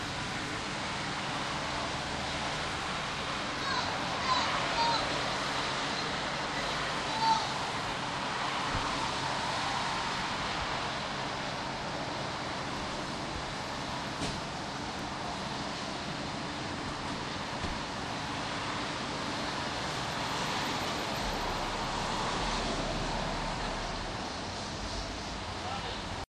riogrande gasstation
Gassing up the car in Rio Grande NJ to head back to the land of crap that is South Florida recorded with DS-40 and edited in Wavosaur.